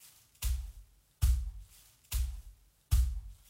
Sonido de pasos de criatura grande